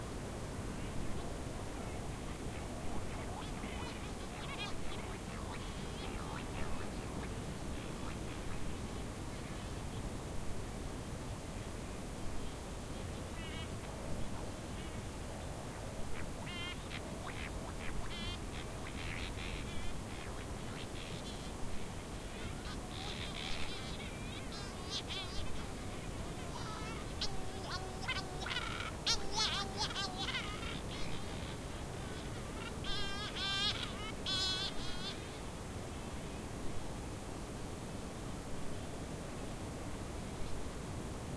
Sorry for the white noise, they were too far and I just have only this budget recorder!
Recorded in El Hierro (Canary Islands), twilight. This is a very classic sound there in the coast when the sun falls in summer.
Recorded with a Zoom H4n, with its internal mic (set at 120º).